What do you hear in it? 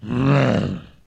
Zombie Roar 5
Recorded and edited for a zombie flash game.
roar, undead, zombie